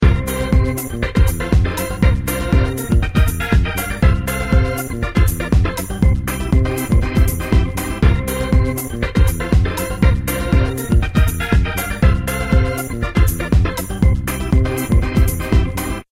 Game win screen background music
This Audio track was created with Apple Garageband back in 2013.
It was part of a game I made for my bachelors thesis.